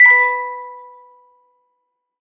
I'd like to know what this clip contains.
Metal cranktoy chopped for use in a sampler or something
childs-toy, cracktoy, crank-toy, metal, musicbox, toy